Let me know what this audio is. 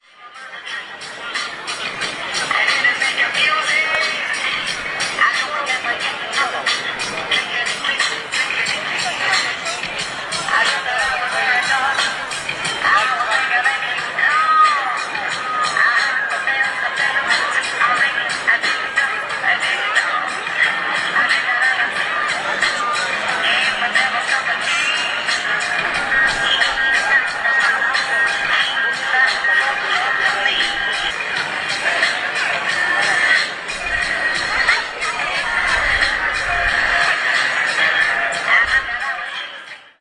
flame throwers show 240910
24.09.2010: about 21.00. ambience from the Old Market Square in the center of Poznan/Poland: music accompanied by flame- throwers performance, hubbub of voices. during soccer match between Lech Poznan and Legia Warszawa (people have been watching live transmission on the Old Market Square).
people, dance, show, market-square, falme-throwers, hubbub, voices, performance, music, field-recording